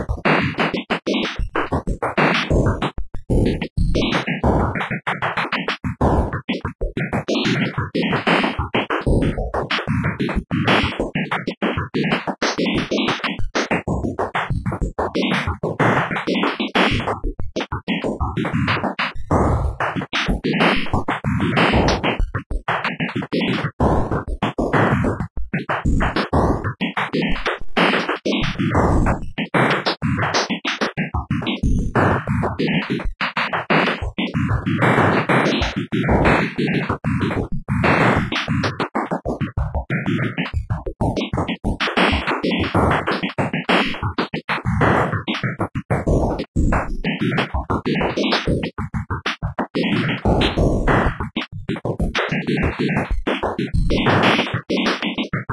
This is a quick little sound generated in Coagula and then hacked up in Granulab.